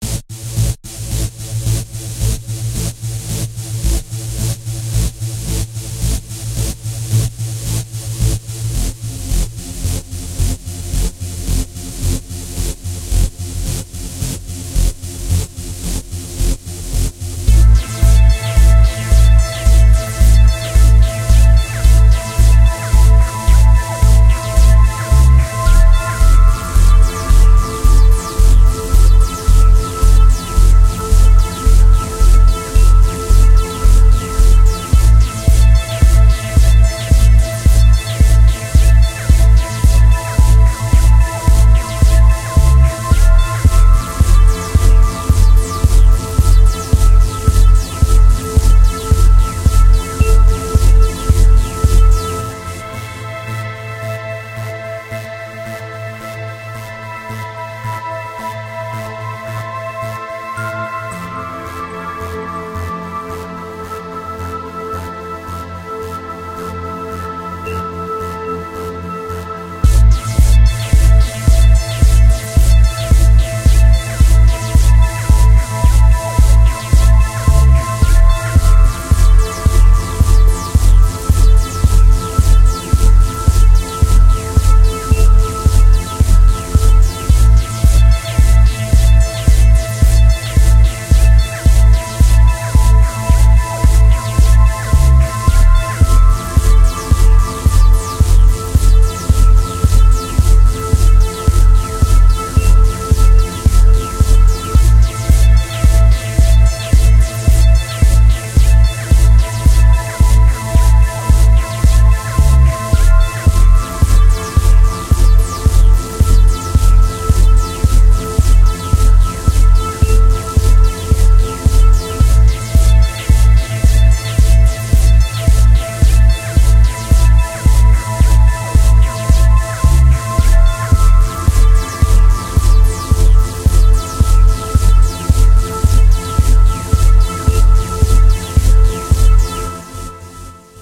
forgot what i named this track
This is a track I made to be used as opening credits, closing credits, or background music.